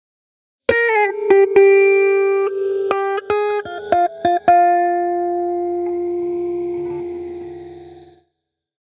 A soft bit of filtered electric guitar recorded in Logic 9.